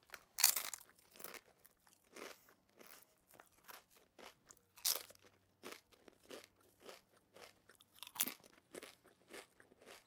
Eating Chips
Eating, Chips